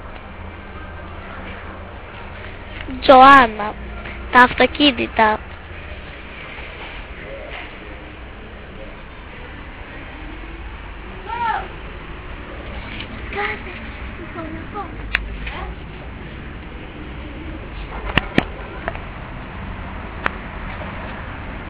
Sonicsnaps made by the students at home.